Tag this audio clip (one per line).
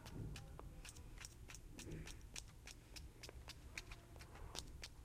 SonicSnaps
School
Essen
Germany